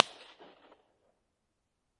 niolon valley 1
popped a balloon in this valley in the National park Le Rove in South of France
Recorded on a zoom H2n in Mid Side mode